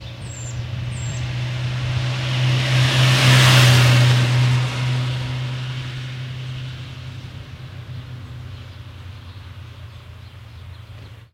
A passing motorcycle. Recorded with a Behringer ECM8000 omni mic.
motorcycle, purist